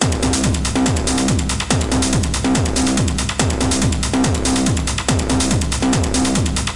4-bar-techno-loop made with rebirth. 142 bpm. slightly improved with some dynamics. before i provided a rebirth-mod with samples from thefreesoundproject.
loop, rebirth, techno